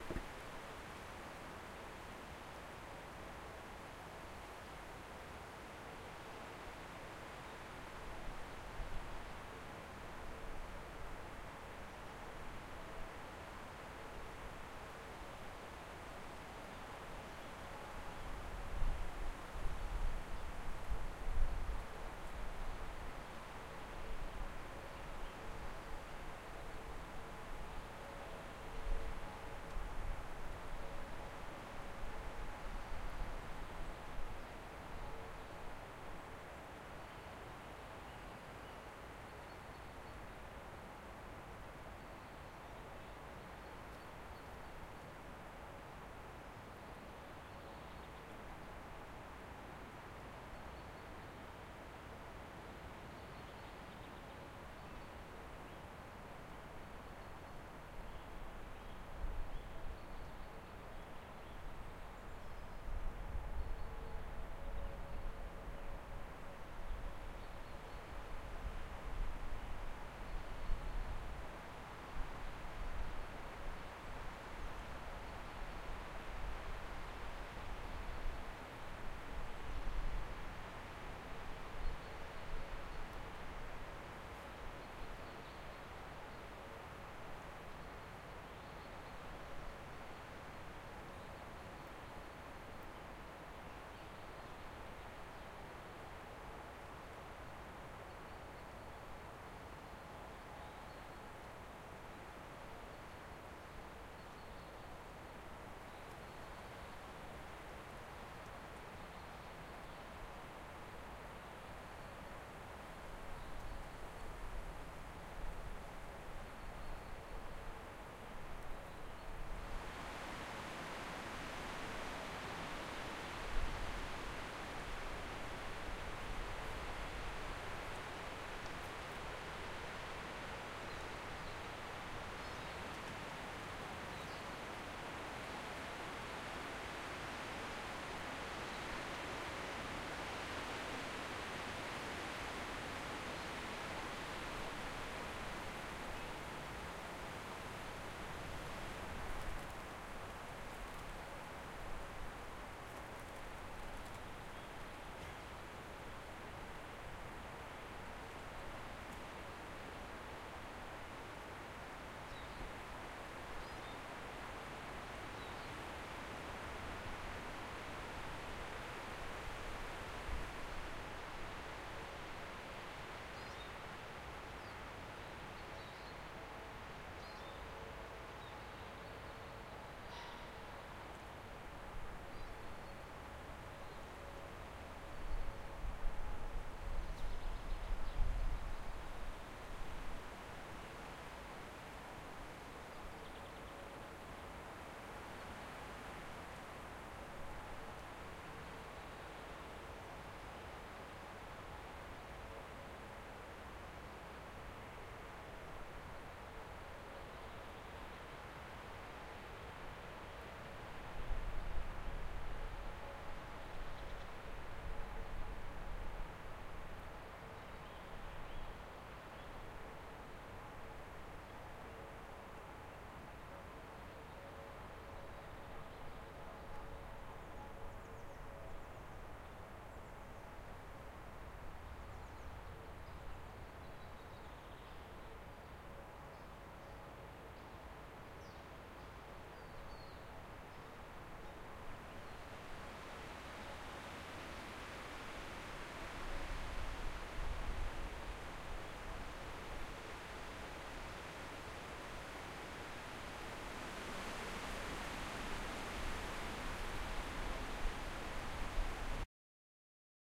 Sounds of nature recorded on a Zoom H4N in Grunewald near Berlin. Couple of wind noises cut out, otherwise unmodified.